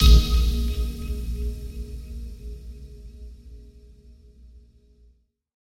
MSfxP Sound 255
Music/sound effect constructive kit.
600 sounds total in this pack designed for whatever you're imagination can do.
You do not have my permission to upload my sounds standalone on any other website unless its a remix and its uploaded here.
effect, fx, Menu, music, percussion, sound, stab, synth, UI